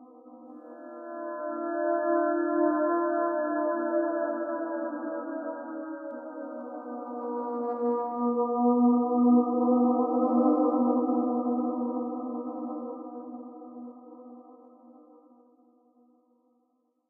voices ew54
Strange discordant voices. Part of my Atmospheres and Soundscapes 2 pack which consists of sounds designed for use in music projects or as backgrounds intros and soundscapes for film and games.
ambience, atmosphere, cinematic, dark, electronic, music, processed, strange, voice